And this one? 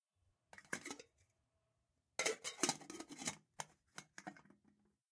Olla Tapa
Tapar, cerra